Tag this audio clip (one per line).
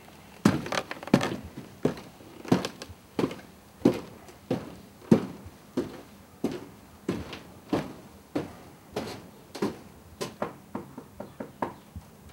stair
steps
wooden